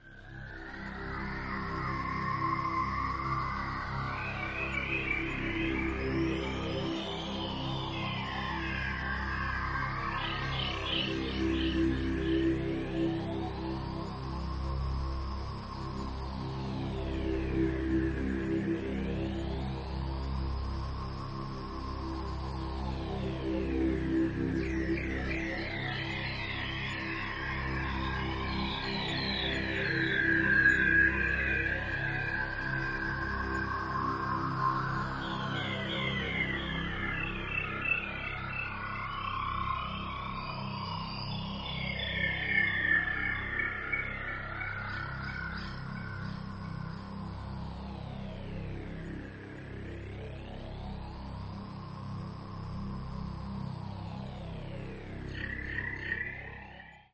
This is a Korg Z1 sound I programmed. It is a slow moving synth pad with complex weaving sounds. This one is the original synth patch unmixed with any other elements.